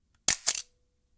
The sound of the Focal TLR 35mm camera with a shutter speed of 1/8 second